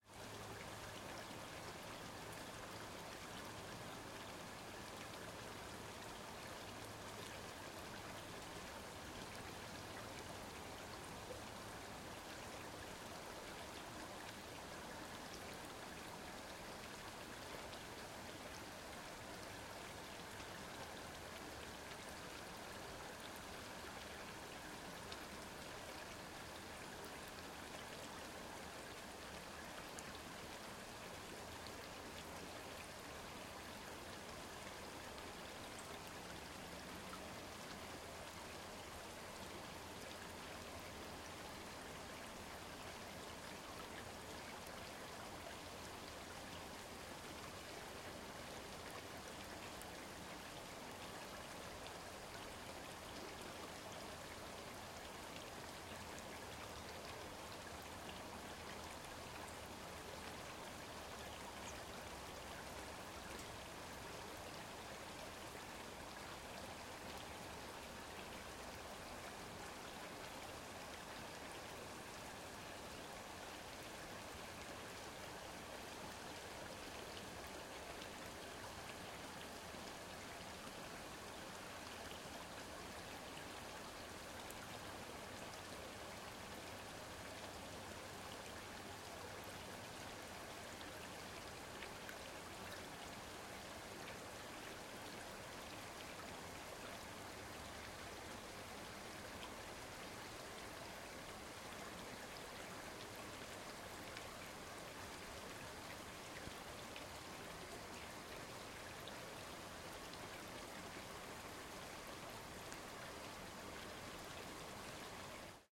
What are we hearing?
Mountain River 7(quiet)
various spots on small river